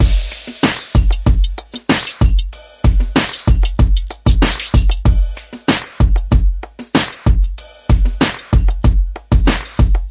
Created in Hydrogen and Korg Microsampler with samples from my personal and original library.Edit on Audacity.
bpm, dance, drums, edm, fills, loop, pattern